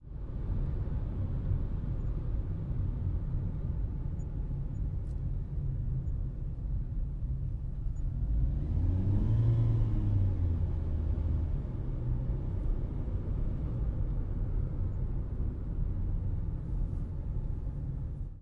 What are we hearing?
Interior Prius drive w accelerate
Toyota Prius C (2015) driving and accelerating. Good gas engine assist and hybrid whine.
driving, Prius, Toyota